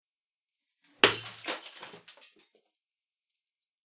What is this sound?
Smashing a Bottle
Bottle Smash: Smashed against a wall. Breaking of glass. Glass shards falling to the floor.
Bottle, Break, Glass, OWI, Shards, Shatter, Smash